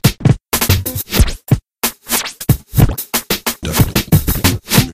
92bpm QLD-SKQQL Scratchin Like The Koala - 013
turntablism, record-scratch